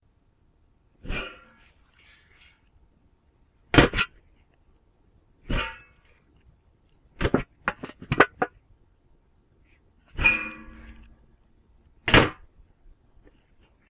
Foley Metal trash can lid opening & closing
bedroom, camera, can, car, common, door, film, foley, foot, garage, house, household, jump, kodak, light, step, switch, trash, trash-can